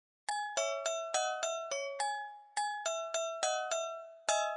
MusicBox-loop-1-Tanya v
mesic-box, loop, electronic, sample